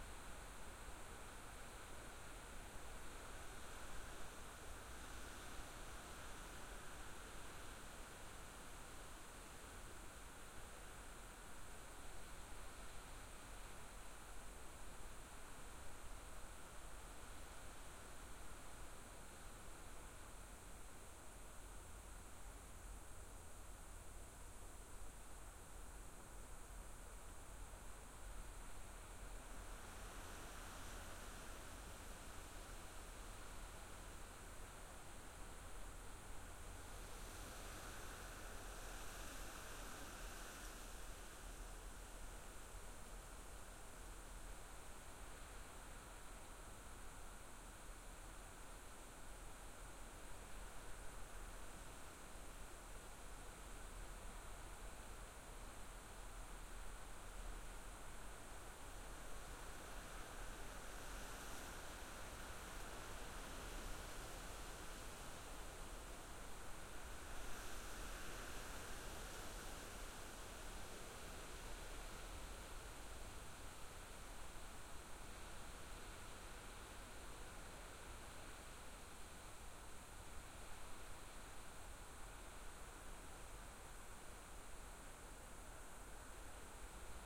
Forest, light wind through the leaves. This sample has been edited to reduce or eliminate all other sounds than what the sample name suggests.
field-recording, forest, light-wind